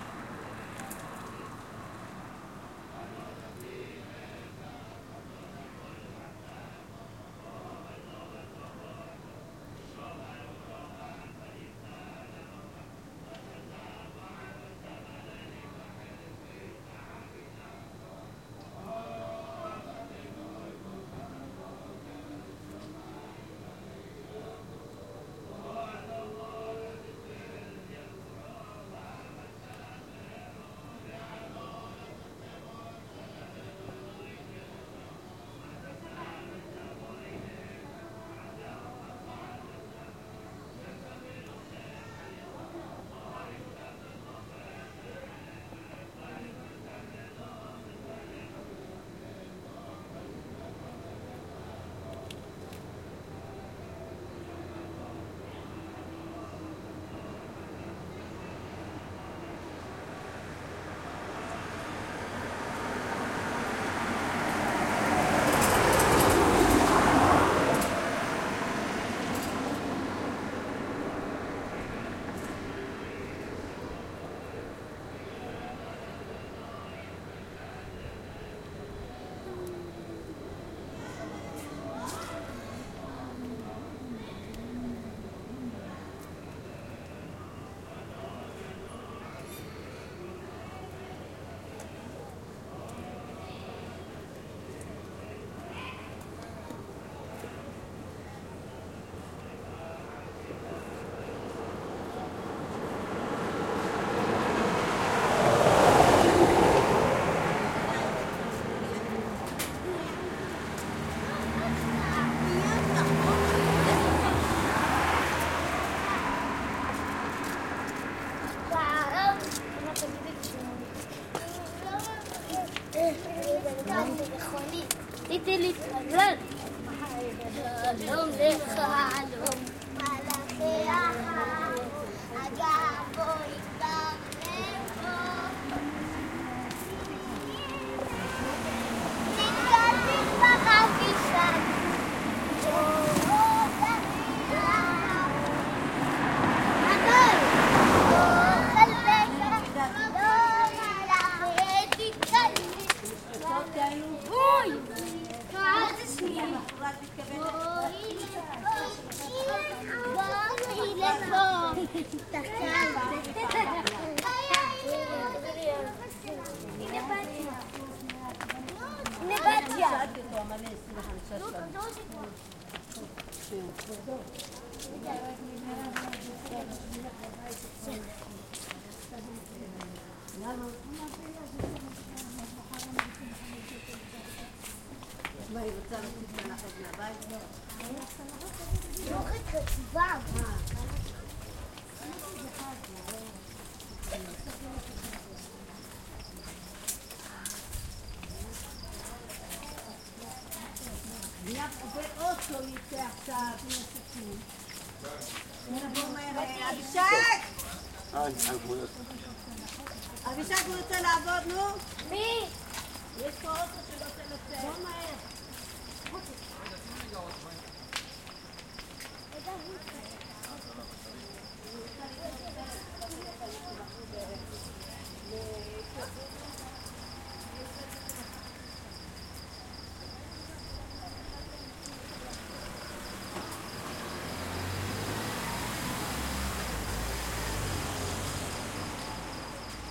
Shabbat prayer Rosh HaAyin
pray, children, religion